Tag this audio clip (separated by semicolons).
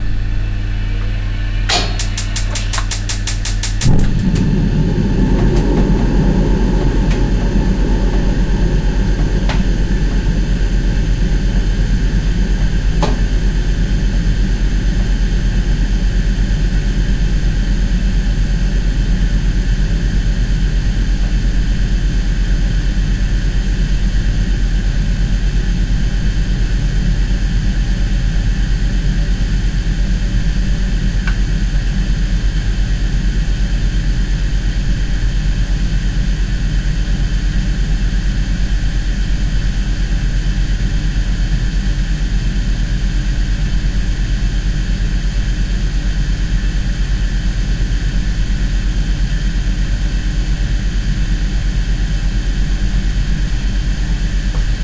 therme; gas; piezo; processed; boiler; gastherme; ignition; burning